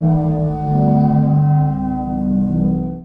One in the series of short clips for Sonokids omni pad project. It is a recording of Sea organ in Zadar, spliced into 27 short sounds. A real giant (the Adriatic sea) breathing and singing.